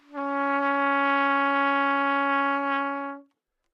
trumpet-csharp4
Part of the Good-sounds dataset of monophonic instrumental sounds.
sample, single-note